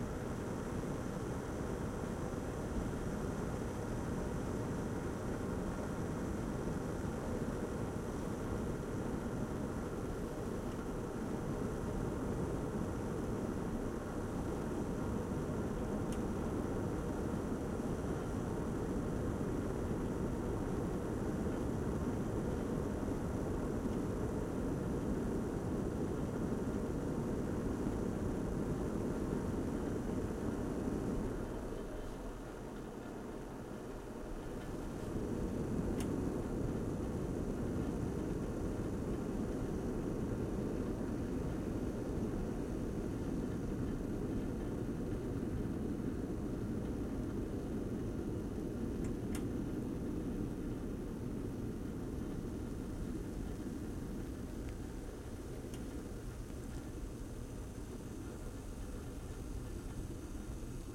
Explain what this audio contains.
kettle heat up froth
heat, froth, kettle, up